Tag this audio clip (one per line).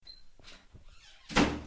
bathroom
bathroom-door
foley
door